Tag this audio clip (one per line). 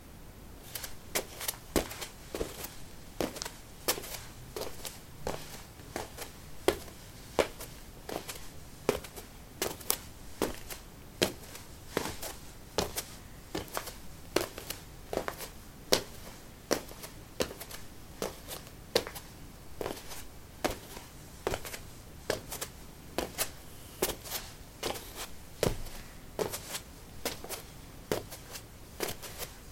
footstep
footsteps
step
steps
walk
walking